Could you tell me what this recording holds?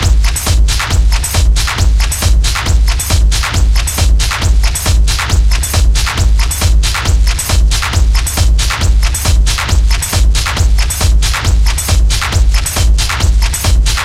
This is my own composition. Made with free samples from the internet, made loops with it, and heavy processing through my mixer and guitaramp, and compressor.
beat, loop, hard, distortion, techno